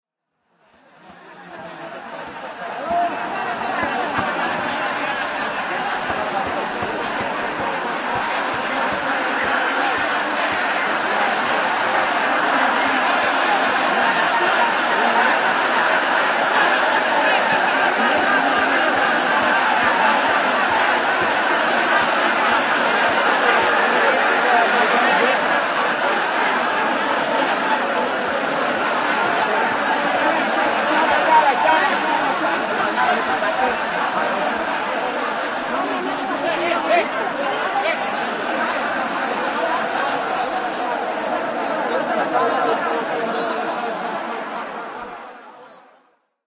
pura kehen cockfight
In Pura Kehen, we come upon a cockfight in full swing. The audience is chanting and yelling in the run-up to the fight.